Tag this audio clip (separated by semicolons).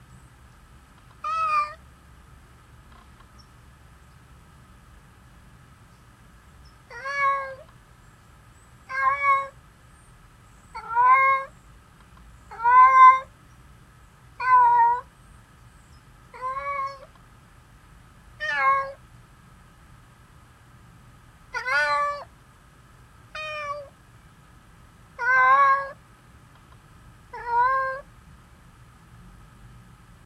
animal; suburban; vocal